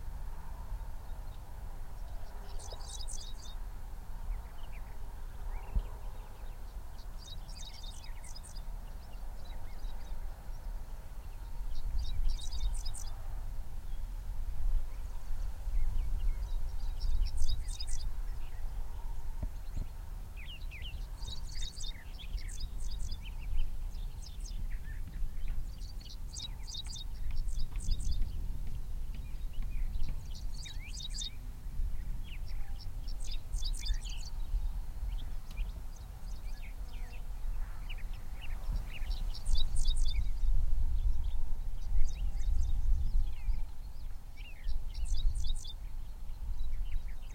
Rural farmland ambience
A summertime recording in an extremely rural location near the Nebraska/Kansas border in the middle of the United States.
But if you feel like saying "thanks" by sending a few dollars my way I'll allow it :)
ambience, america, birds, country, countryside, distant-traffic, farmland, field, field-recording, kansas, midwest, nature, nebraska, outside, rural, summer